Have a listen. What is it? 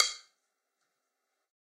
Sticks of God 013
drumkit, god, stick, drum